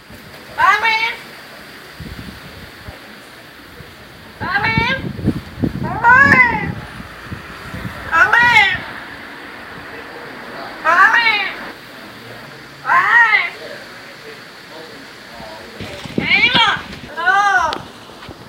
I used a recording app on my Samsung Galaxy 3 phone to capture a caged parrot talking to itself, outside of a local restaurant. Unfortunately there was also a couple talking - but the parrot is loud and distinctive. A little wind noise. Cleaned up in Audacity. And I have no idea what the parrot was saying!